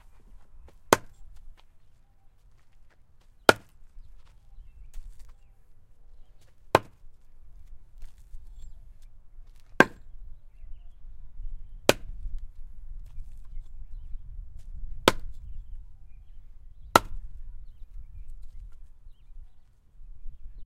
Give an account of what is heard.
Wood chopping
This was recorded with an H6 Zoom recorder at my home where I struck some firewood with an axe giving a light thud and a soft metallic ting.
axe, hit, hitting, impact, metal, metallic, OWI, strike, striking, thud, ting, wood